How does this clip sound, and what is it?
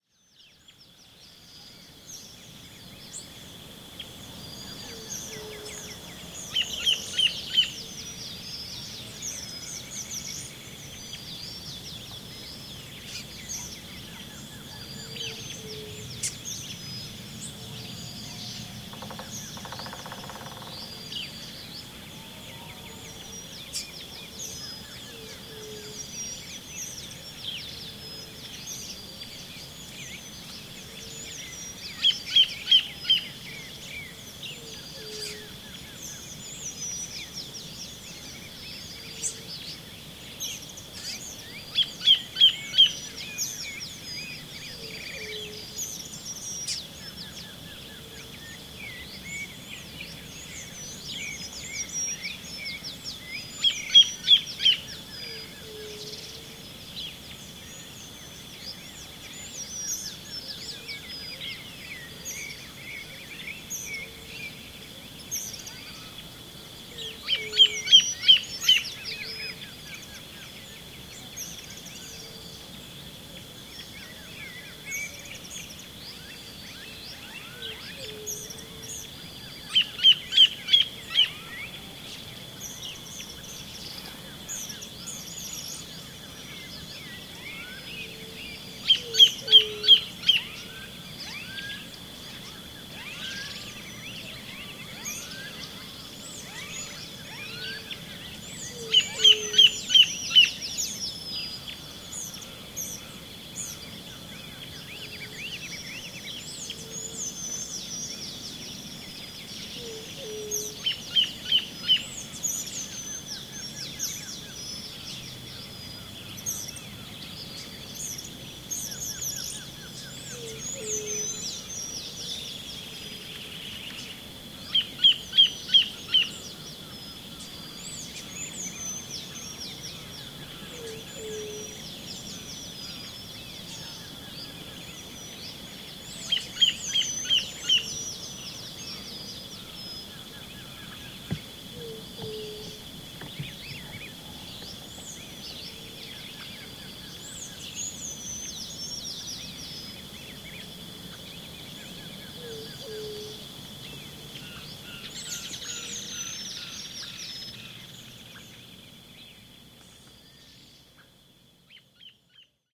2014 10 04 Fazenda Cana Verde morning birds 7
Early morning before sunrise on a big farm, so-called fazenda, in the Sao Paulo hinterland, near Campinas, Brazil. Song and calls of birds near the residential area of the farm, by a small pond. Waterfall noise in the background.